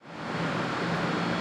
Avion-Reacteur+Amb(st)
General ambiance with a plane in background at Roissy Charles de Gaulle airport recorded on DAT (Tascam DAP-1) with a Rode NT4 by G de Courtivron.
airport, ambiance, plane, roissy